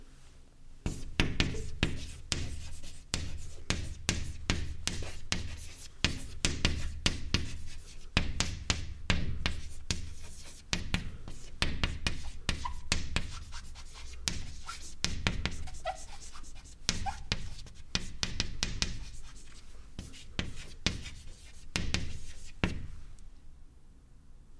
Chalk - Writing - 01
Someone writing on a blackboard with a chalk - interior recording - Mono.
Recorded in 2012